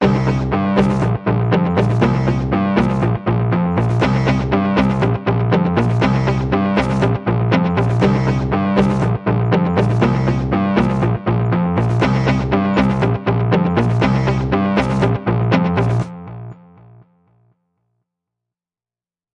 Goofy Music
A shot piece of music that can be used for credits on shot films
Goofy, groovy, music, percussion-loop